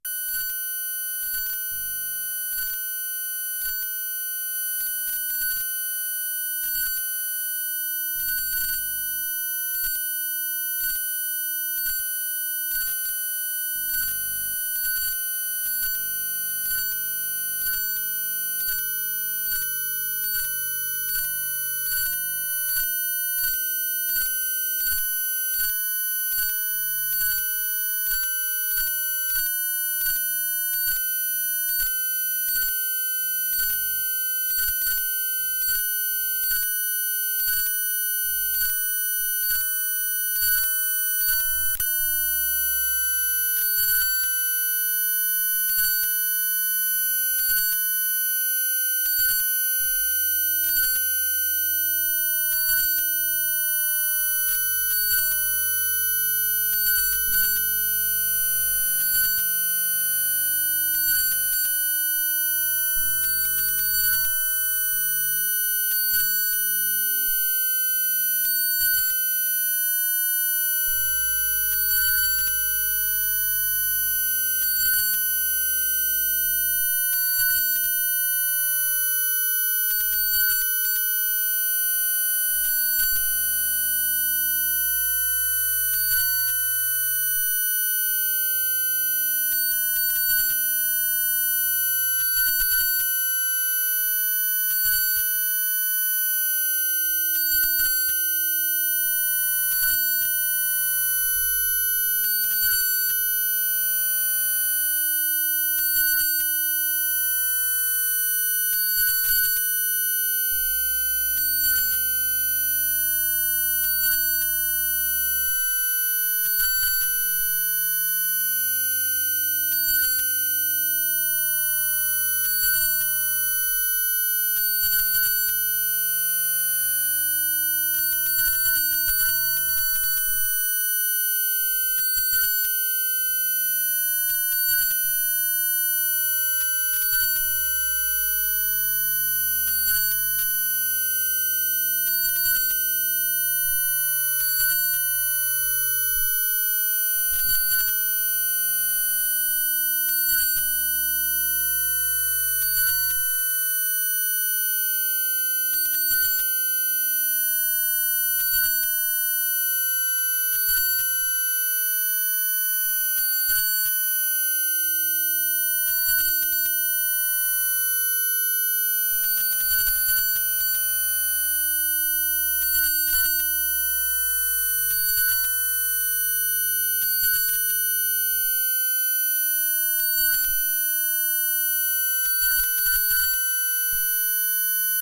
we get (mtv2) signal
The satellite signal went down, producing garbled images and this sound which I recorded on HiMD.